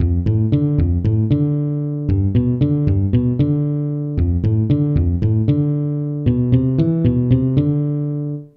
Was messing around on the piano and started fingering this line. Switched it over to bass. Combination of two basses and some plucking sound: all just sound card midi sounds. Tweaked the bass deeper with Audacity. Peace out and enjoy.